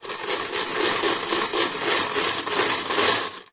Rhythmic swirling of glass mancala pieces in their metal container.
swirl, game, glass, clatter, metal, mancala, rhythm